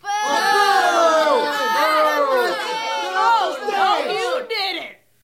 Boo 7 Get Off The Stage No You Didn't
Small crowd booing, yelling "Get off the stage!" and "Oh no, you didn't!"
angry, theater, studio, booing, boo, audience, group